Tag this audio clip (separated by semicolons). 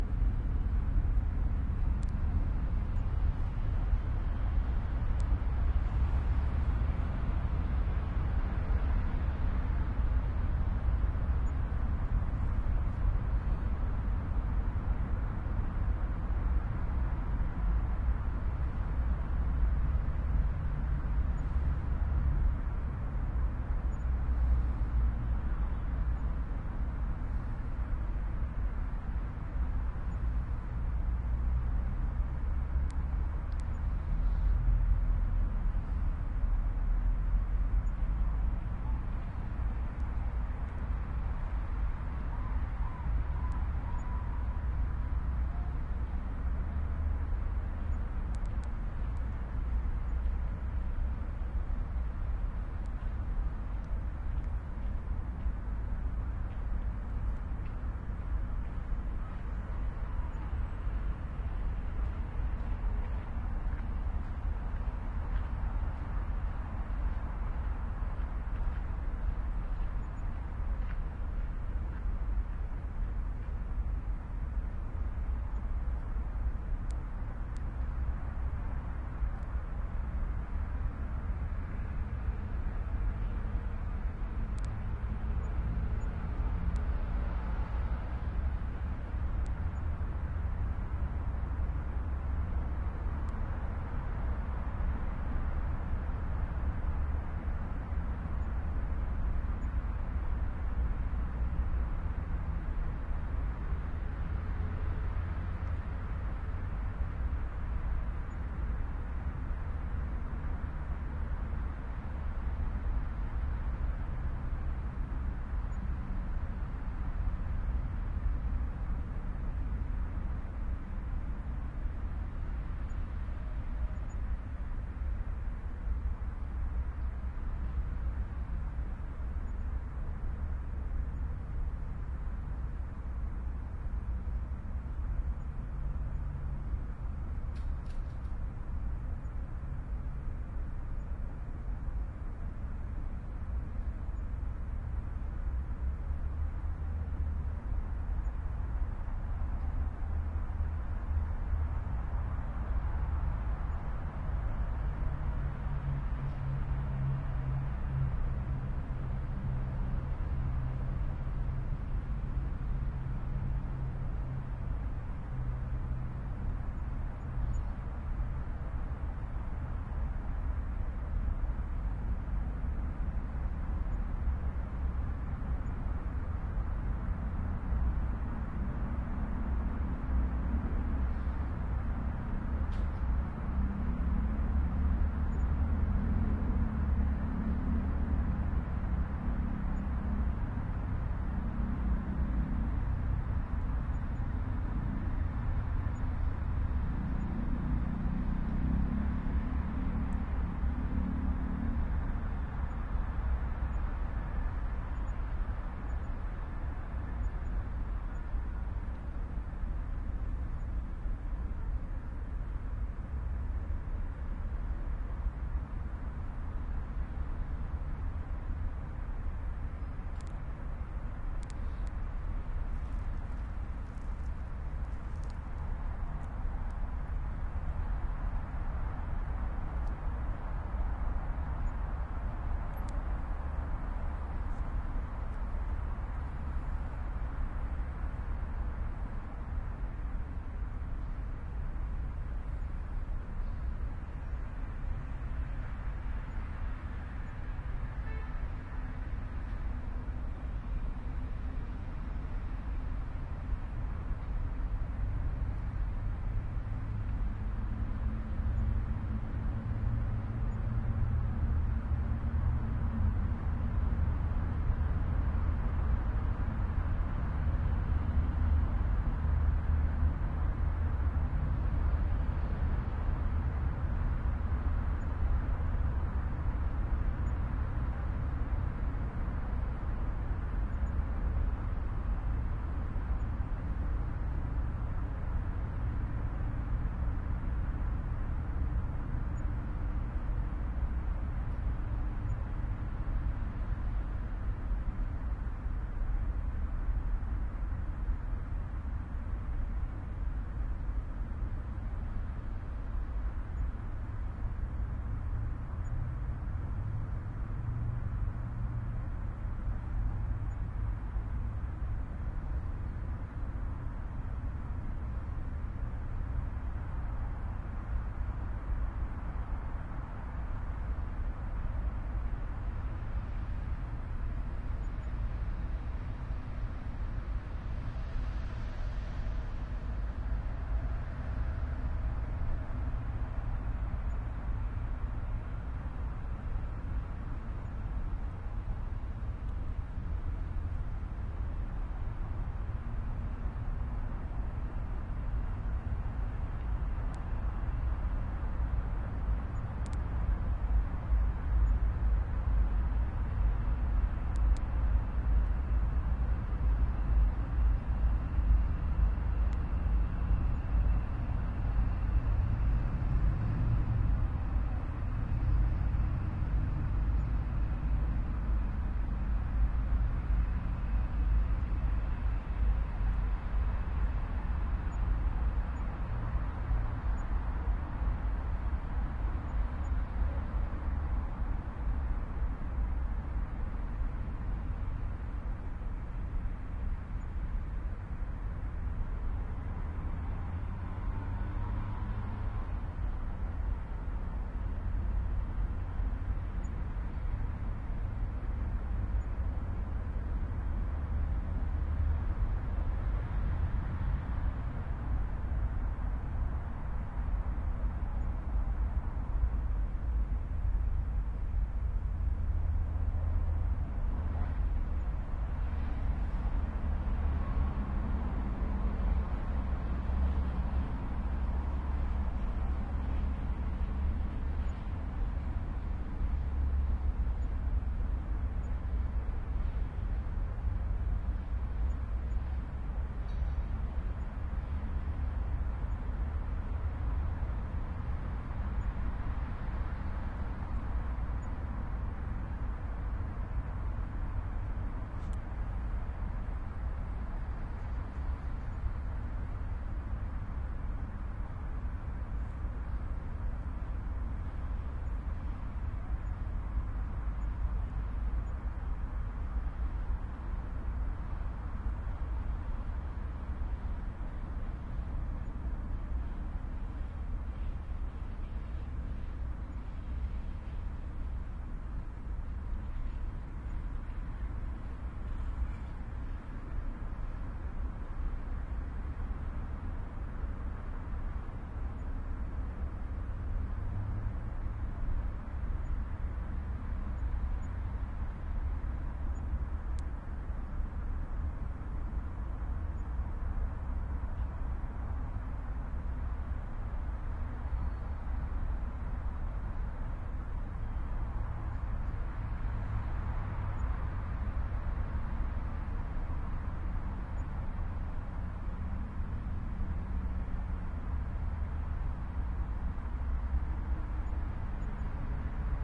binaural; cars; city; city-park; midnight; motorbikes; park; soundscape; traffic; turia-garden; urban